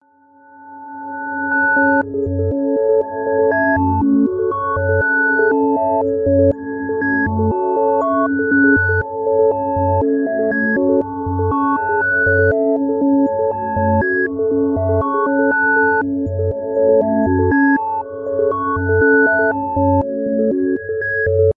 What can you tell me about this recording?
sine-waves
An ensemble of sine waves, reversed reverbed.